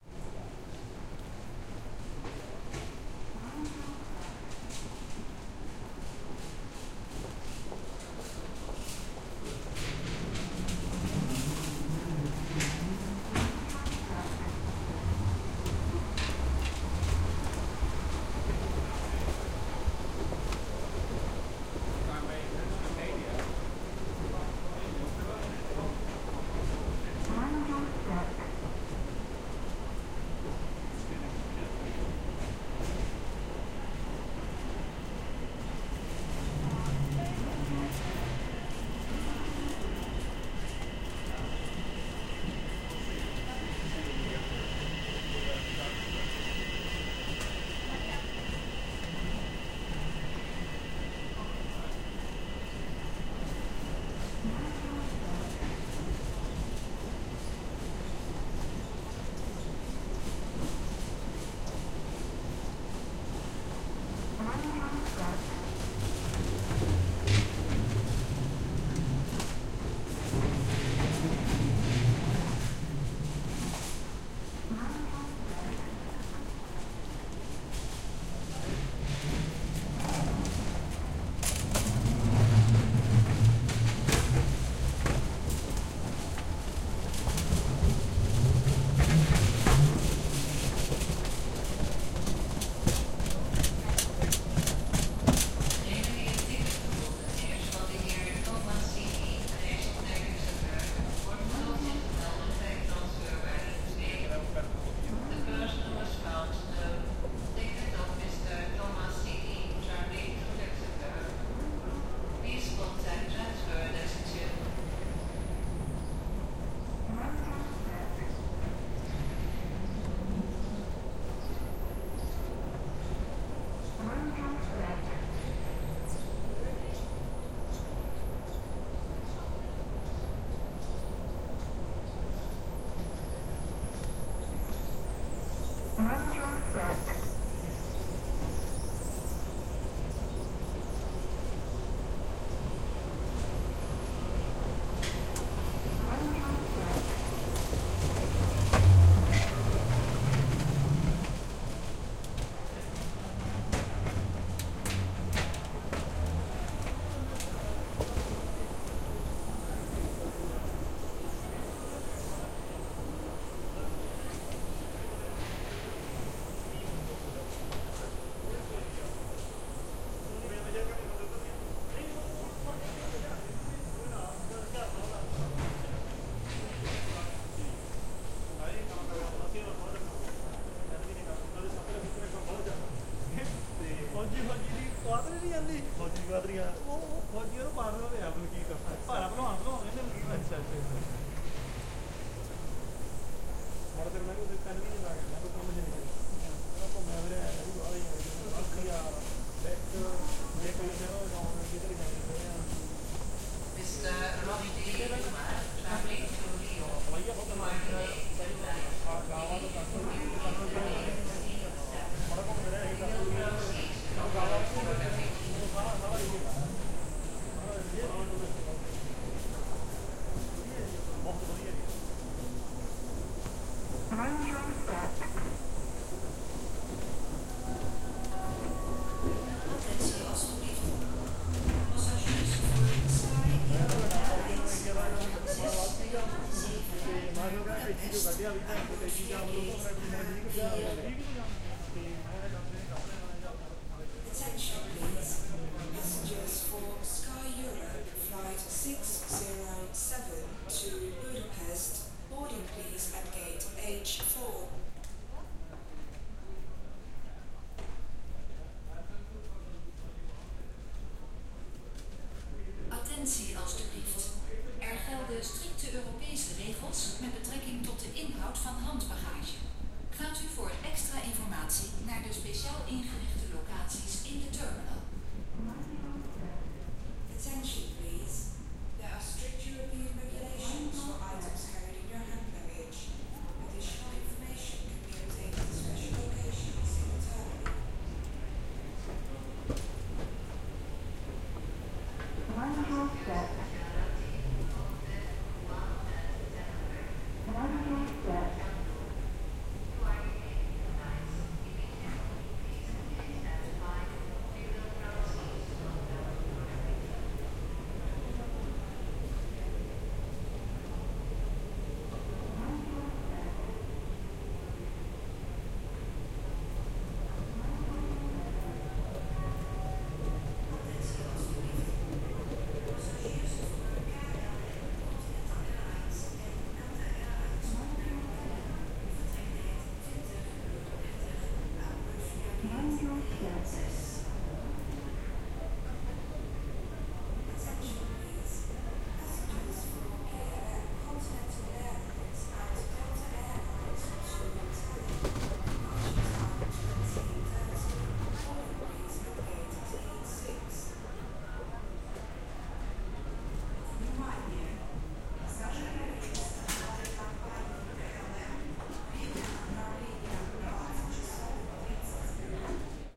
Recording of a short walk through the Schiphol airport travellators. You can also hear rolling suitcases and a particular "end of the belt" warning, I guess it says "watch your step", but I wouldn't swear it. M-Audio Microtrack with it's own mic.
airport, ambience, automatic-walkways, field-recording, loudspeakers, waring, wheel-suitcase